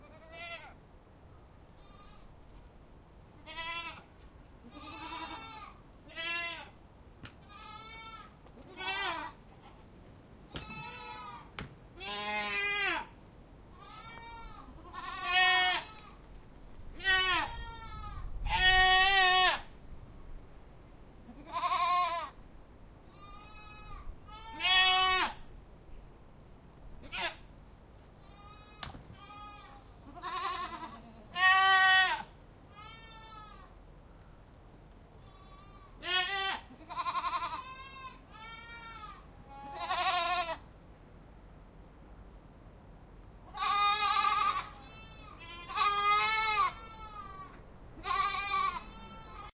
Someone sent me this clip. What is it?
You can hear some goats.